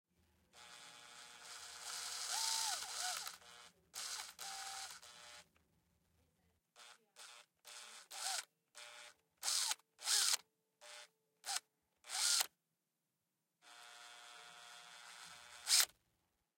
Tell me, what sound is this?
DeLisa Foley servos talky 01
A Makita power drill Foleyed by DeLisa M. White as the servos for a robot.